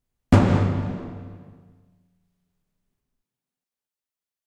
Davul(Greek ethnic instrument) Beat Recorded in Delta Studios. Double Beat.
Effect used: Small prison hall Space Designer